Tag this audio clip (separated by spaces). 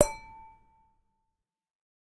wine glass clinking crystal wine-glass clink